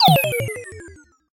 Game Bleeps 2
Something synthesised in NI Massive which could be used as an effect in an old-school game or something similar.
game, arpeggio, massive, arps, synthesis, oldschool, bleep